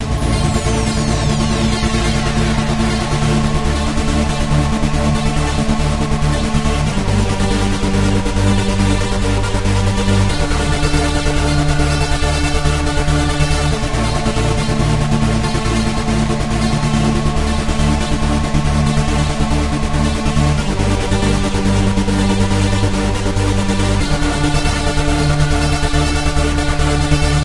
Gothic Dutch Trance
A full Dutch Trance loop with a Gothic choir behind the Trance. Gated and some soft plucky leads.